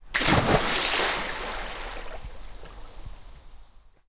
Splash, Jumping, F
Raw audio of someone jumping into a swimming pool. Browse the pack for more variations.
An example of how you might credit is by putting this in the description/credits:
The sound was recorded using a "H1 Zoom recorder" on 14th August 2016.
jump, pool, splash, splosh, swimming